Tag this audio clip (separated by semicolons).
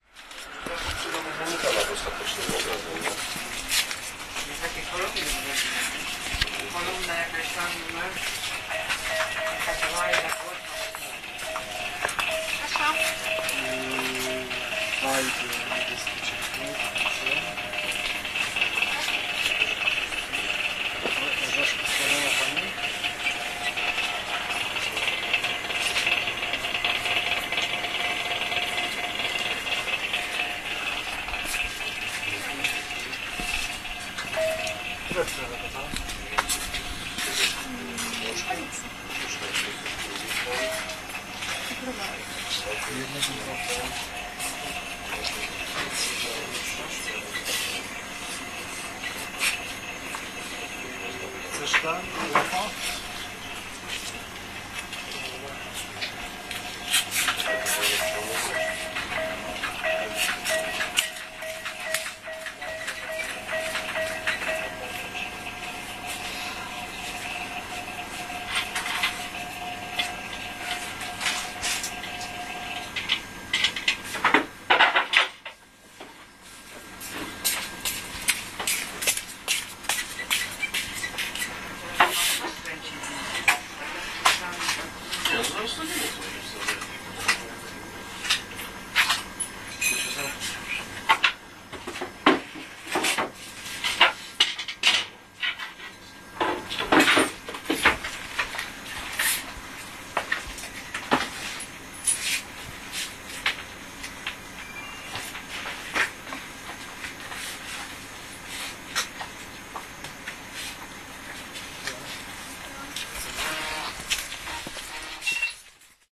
buzz; shop; sough; voices